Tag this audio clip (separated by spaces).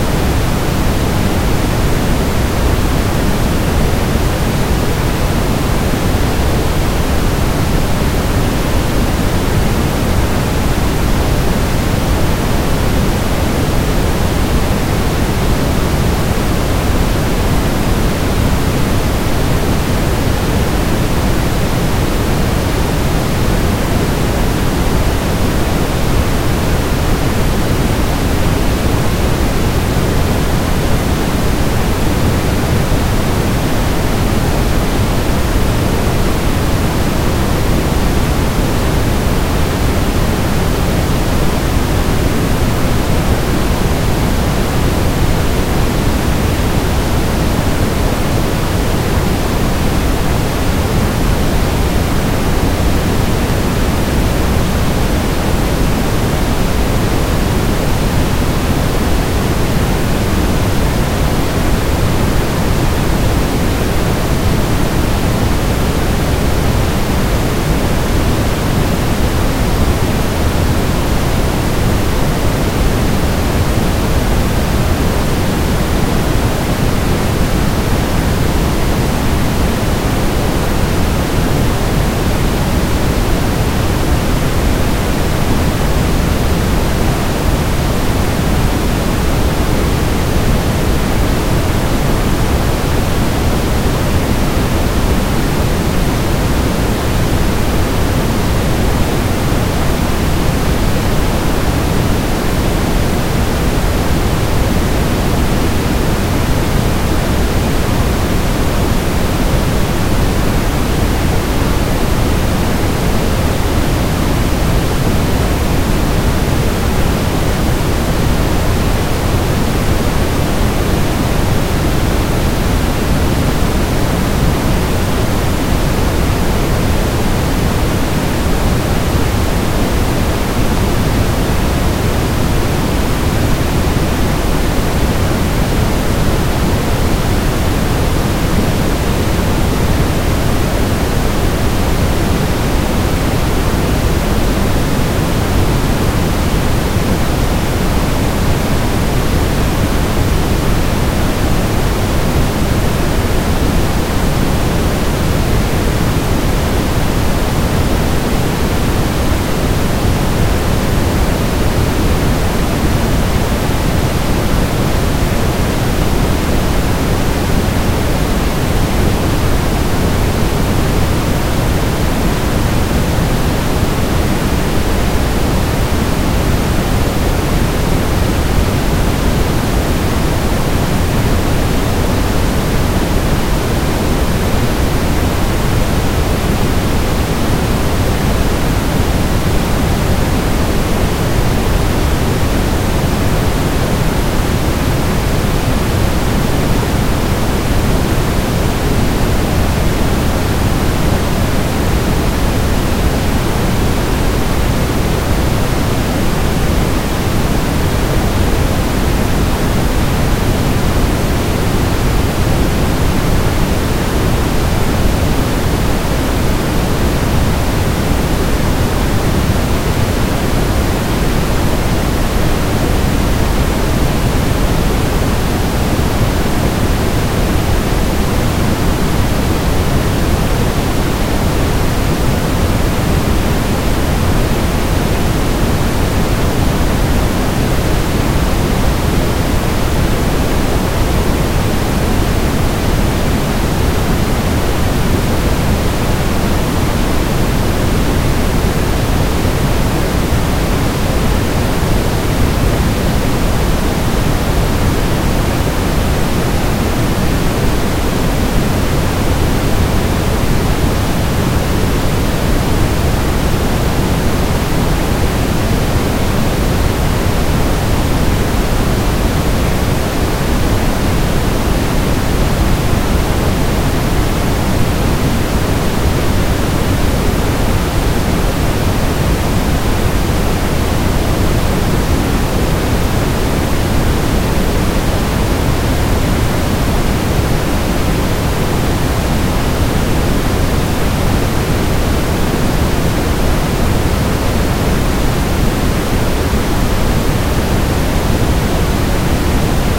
noise tone sample testing-purpose